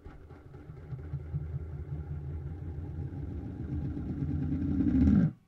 1. Roll a small plastic tub (don't let it run, let it swing in its place)
2. Hit record
Here you go :)
This one with open side down